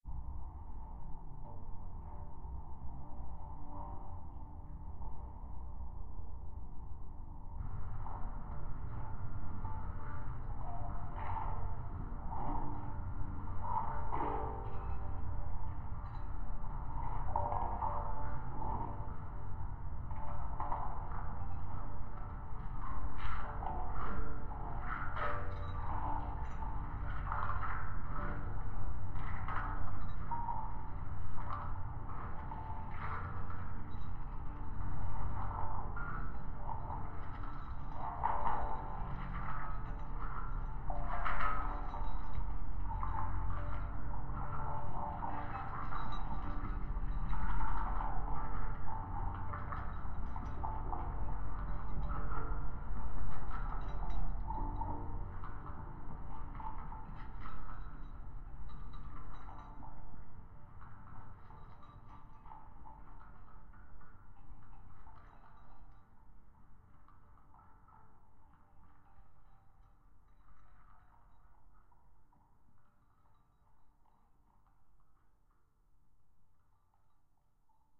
the dishes
lowercase minimalism quiet sounds
lowercase, minimalism, quiet, sounds